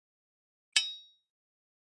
Hitting Metal 03
impact, iron, dispose, garbage, metal, hit, rubbish, metallic